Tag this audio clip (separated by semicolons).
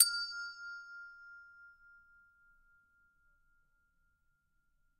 Christmas; percussion; bell